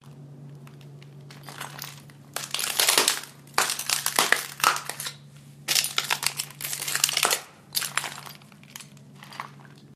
Walking on Broken Glass
Footsteps on broken glass.
broken, cracks, feet, floor, footsteps, glass, steps, walk, walking